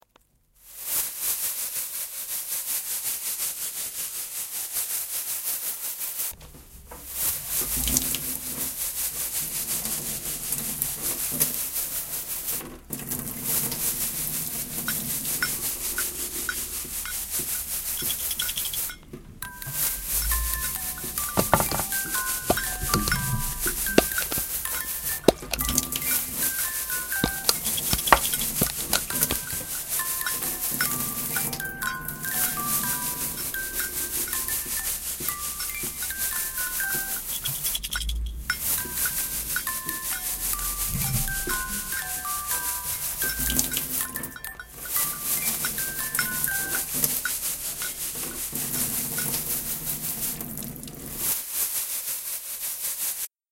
Soundscape GEMSEtoy David
After listening to mySounds from our partner school David made a selection to create a Soundscape